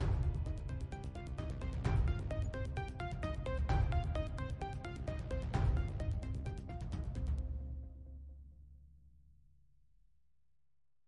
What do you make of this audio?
This loop has created using Kontact 5 and woudl be ideally suited for TV and film soundtracks